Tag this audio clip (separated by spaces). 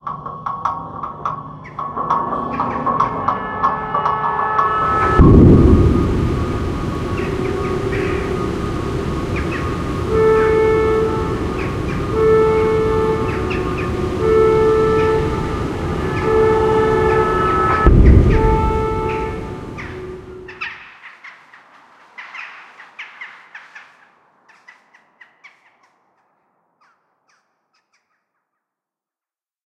kanaalzone Ghent phone nature mix industry rural alarm